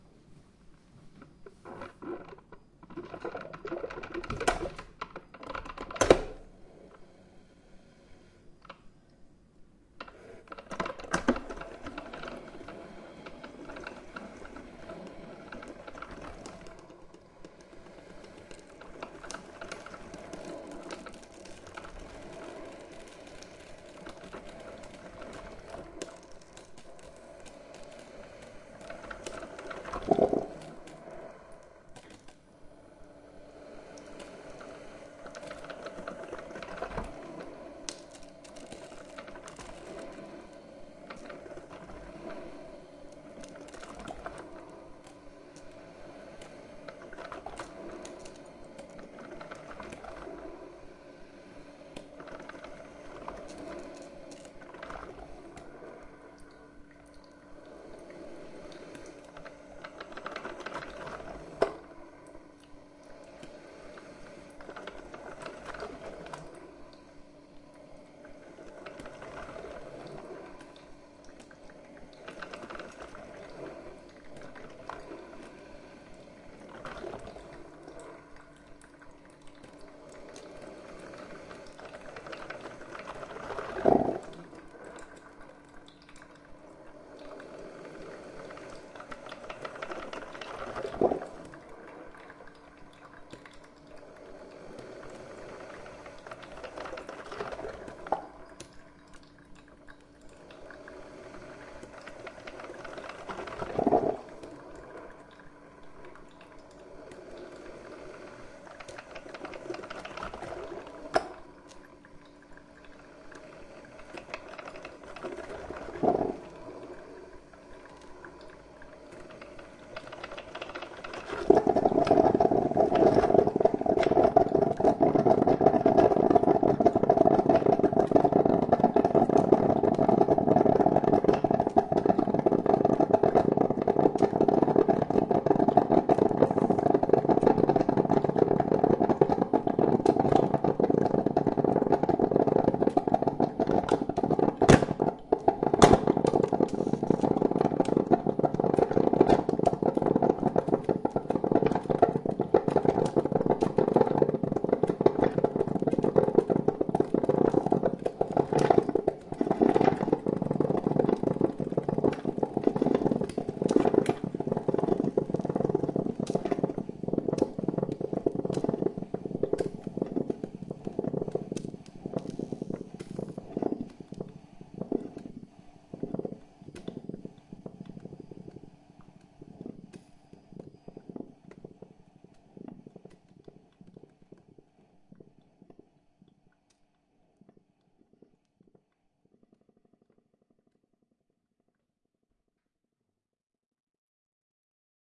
A Philips coffemaker brewing a cup of coffee, recorded with a Zoom H1.
cafe, coffee-maker, appliance, coffee, maker, kitchen, espresso, cup